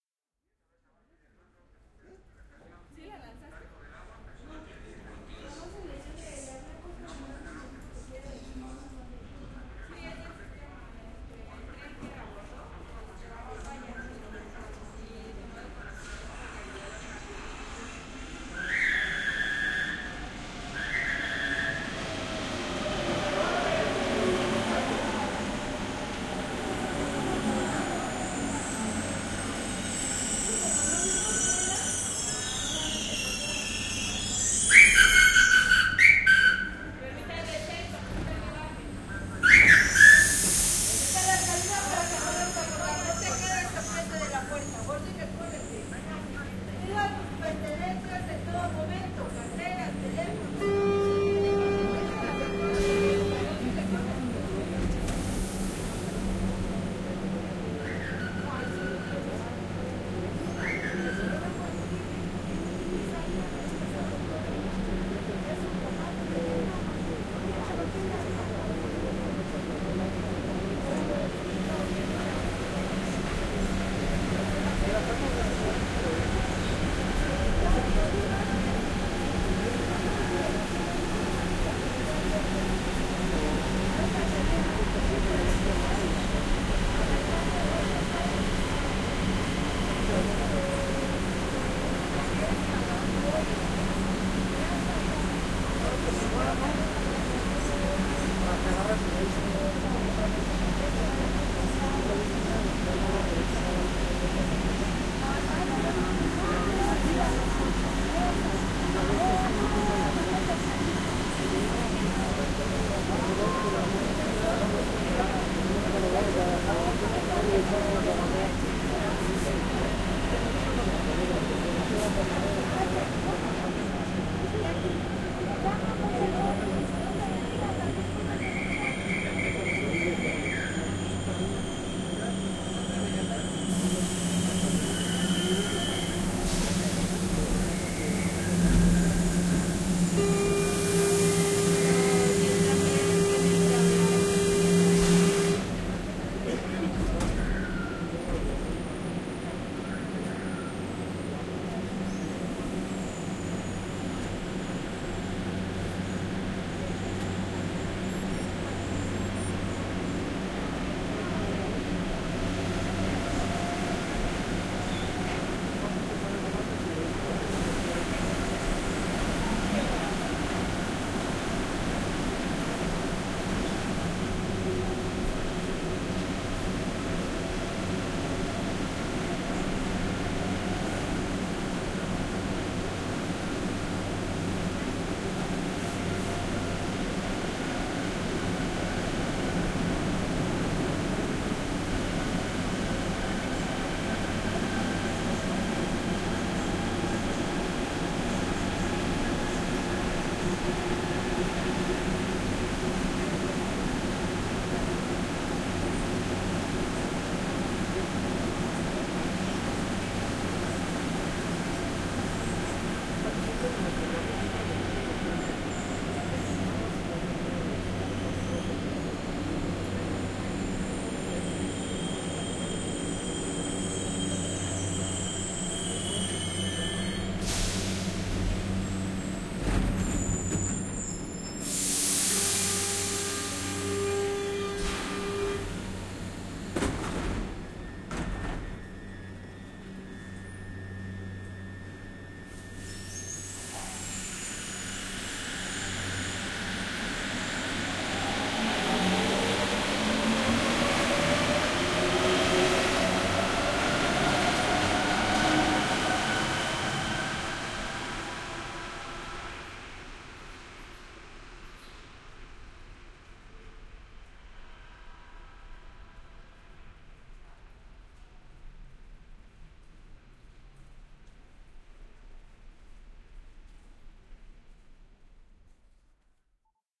Un recorrido en la linea 9 del metro de CDMX, Mexico.
Abril 2022.
Grabado con FEL's 2x EM272 omni mics.
cdmx, field-recording, mexico